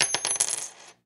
Silver Quarter 1
Dropping a silver quarter on a desk.
Coin, Currency, Desk, Drop, Money, Quarter, Short, Silver